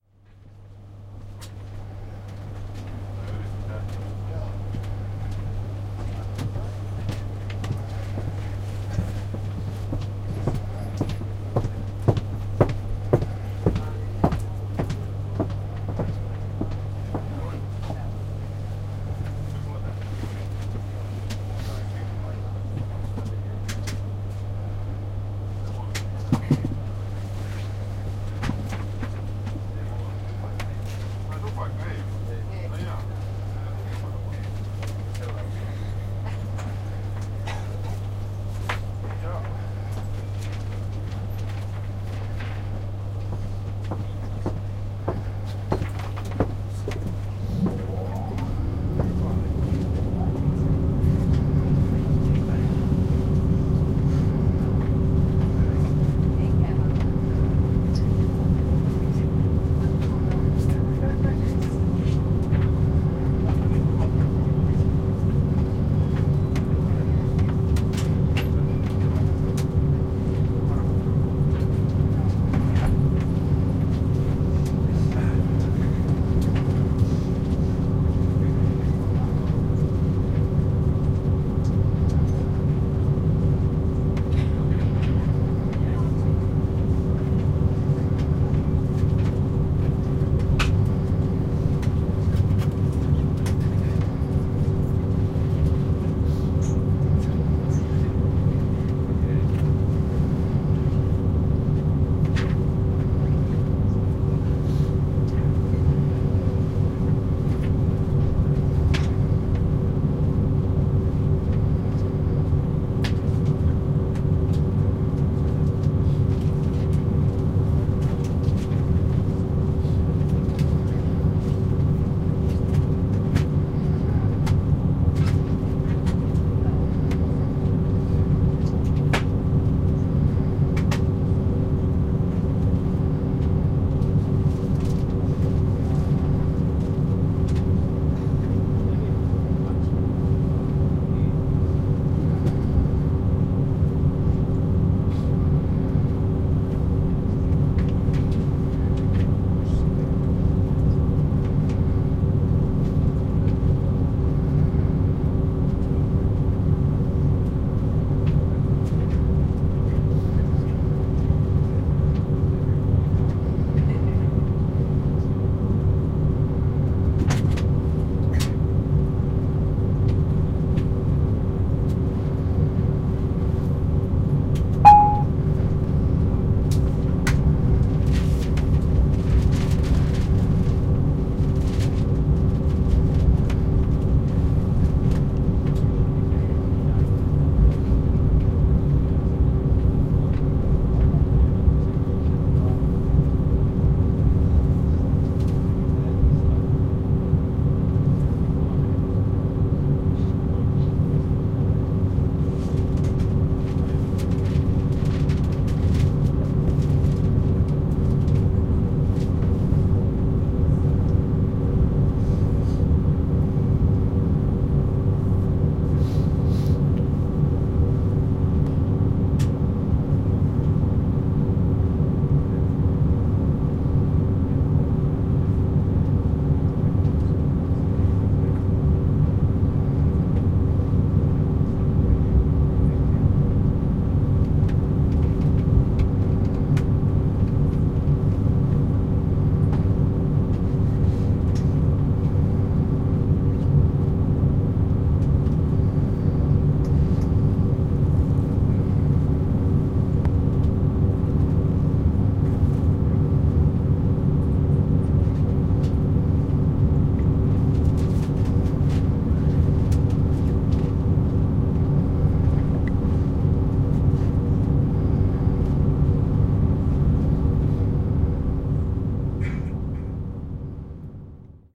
pendolino train - int - start - pendolino-juna sisa- lahto
I do it only if asked.
announcement-tone, car, drive, driving, engine, field-recording, int, motor, pendolino, start, train, vehicle